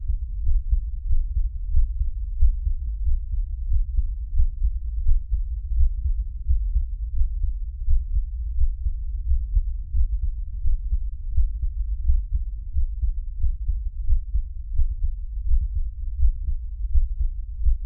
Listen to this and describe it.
Heart Beat (SM57)
Recorded my heartbeat using an SM57 held directly to my chest. Was getting back in tune with Pro Tools.